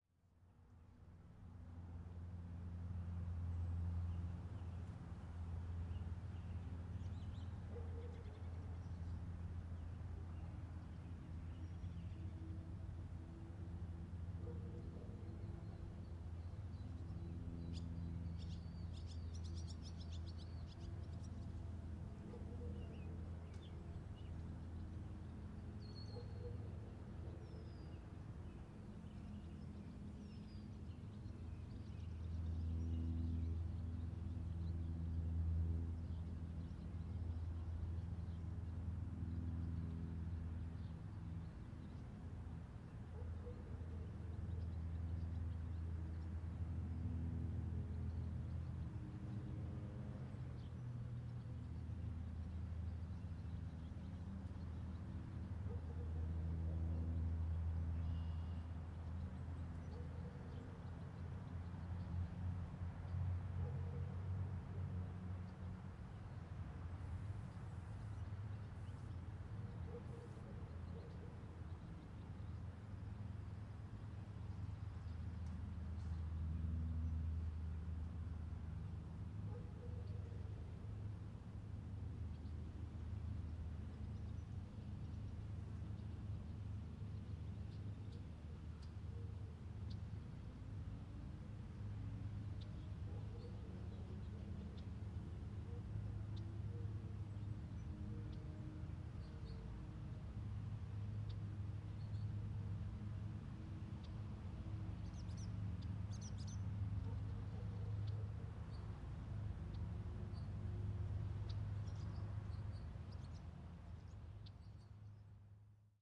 Ambience of a farm in rural Michigan. You'll hear birds, a light breeze, a small airplane and farm equipment off in the distance.